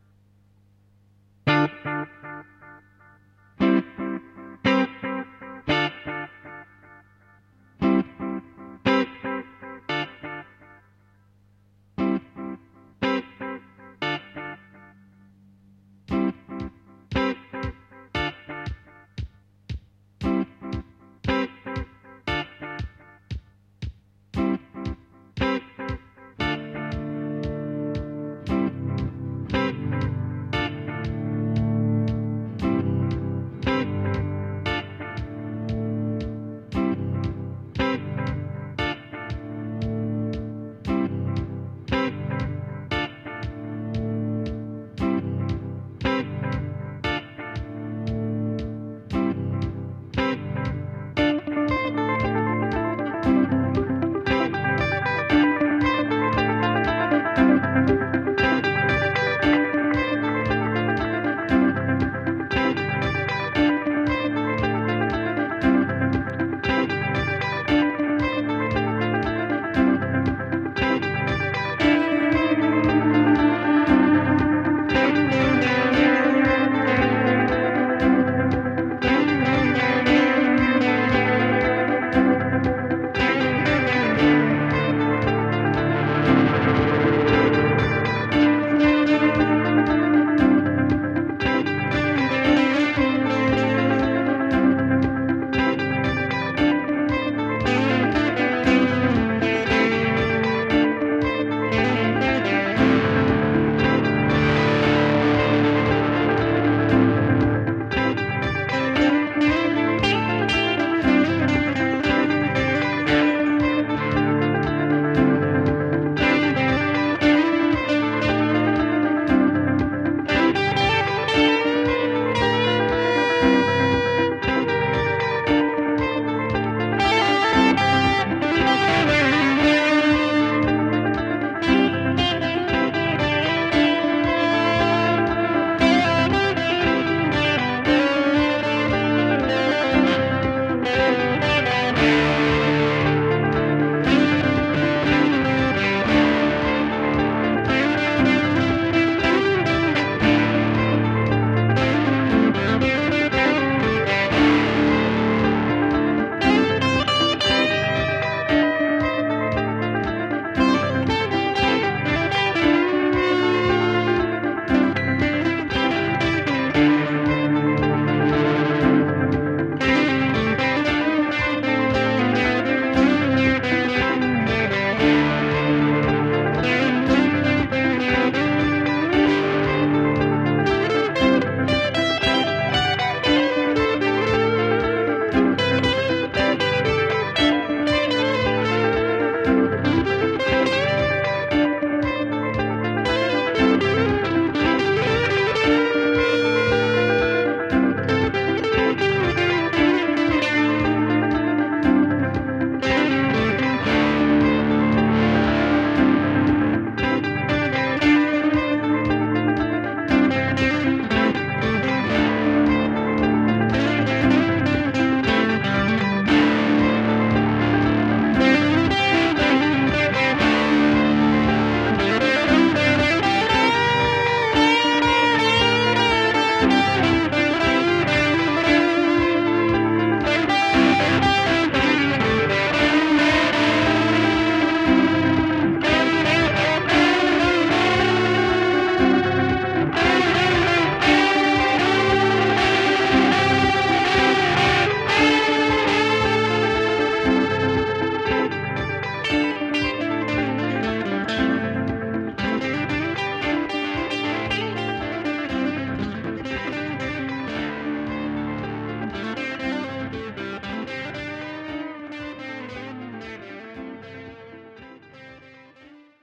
Electric-guitar Improvisation in loop-machine. waw
This is instrumental Improvisation in Dm tonality which was record in real-time, when I plaed in electric guitar through ELECRTO-HARMOX DOUEBLE MUFF, YOJO DIGITAL DELAY, BOSS FRV-1 and BOSS RC 20 loop-machine.
Some experiment sketch.
Key in Dm.
power-chord, riff, Loop, electric, overdrive, music, distortion, fuzz, solo, echo, sketch, experiment, Improvisation, distorted, guitar, electric-guitar, chord, reverb, Dm-chord, lo-fi, rock, instrumental, delay